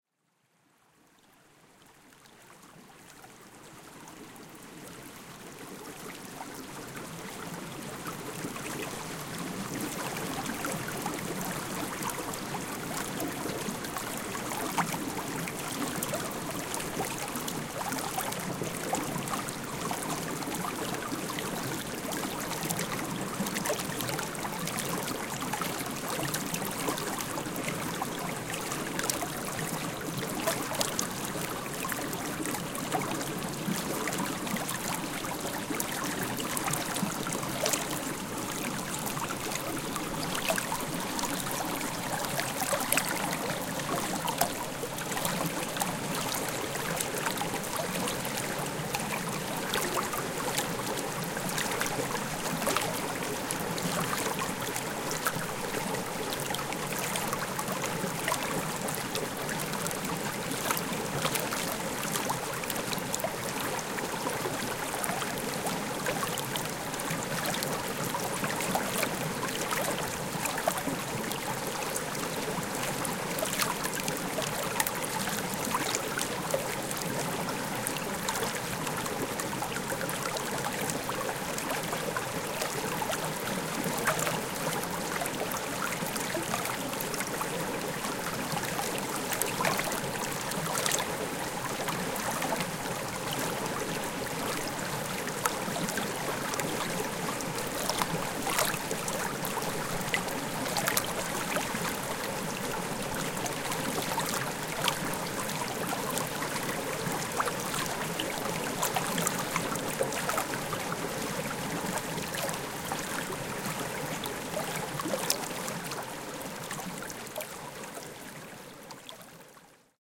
This is a recordings of the water running in a little creek at Wollemi National Park, NSW, Australia. It is a 2 minute sample. Hope you enjoy this one. Fa:z